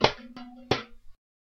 Live Loop 006
Sample of one of the sections where me and Joana played together. I choose one of the 'best played' parts and made it loopable.
For these recordings we setup various empty cookie cans and we played drummers using 2 pens or little sticks.
Recorded with a webmic.
Joana also choose the name for these sessions and aptly called it "The Bing Bang Bong Band"
Recorded with a webmic on 6 Dec 2011.
child, rhythm